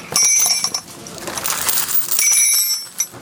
Polozeni retezu
Putting steel chain on concrete floor
floor, steel, chain, metal